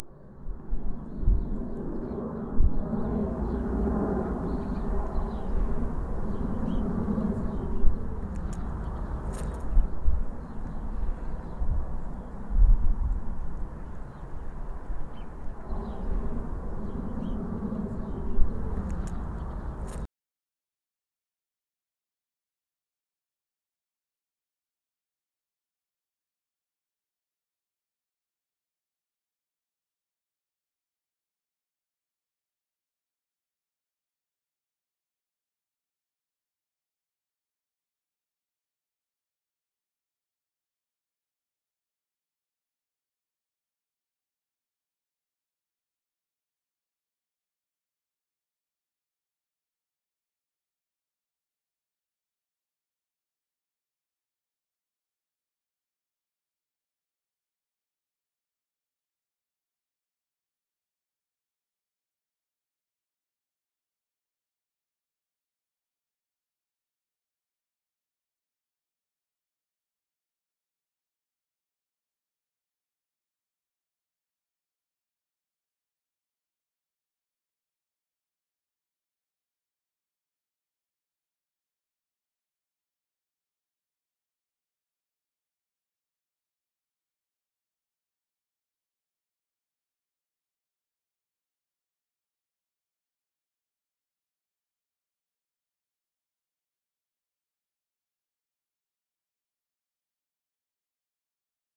You can hear the sounds of outdoors. There are birds chirping, the wind is blowing and you can hear airplanes fly above
exterior-sounds, outside-sounds